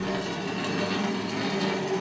recordings from my garage.